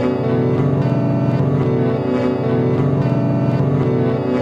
loop guitar 08
This sample pack are the result of an afternoon of experimentation
engraved with a MPC 1000, is an old guitar with the pedal Behringer Echo Machine, I hope you find it useful
Este pack de muestras, son el resultado de una tarde de experimentación
grabado con una MPC 1000, es una vieja guitarra con el pedal Echo Machine de Behringer, espero que os sea de utilidad
echomachine, Guitar, MPC